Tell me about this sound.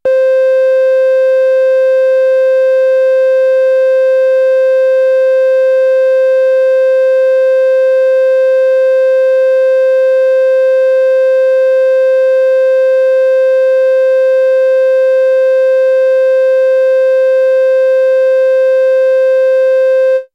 Mopho Dave Smith Instruments Basic Wave Sample - TRIANGLE C4
basic, dave, instruments, mopho, sample, smith, wave